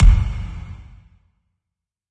kik9a-wet
drum, experimental, hits, idm, kit, noise, samples, sounds, techno